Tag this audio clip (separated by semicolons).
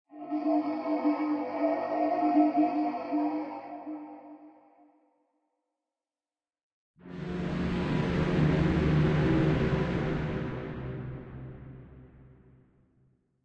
Alien; Distant; Effect; Machines; Mass; Reapers; War